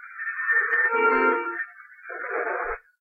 A short loop from AM channels on my radio.
lo-fi, noise, loop, radio, fm, am